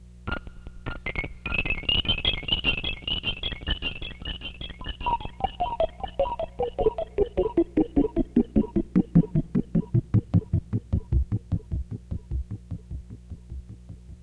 Noise Design
designed from my emx-1 using white noise and multi fx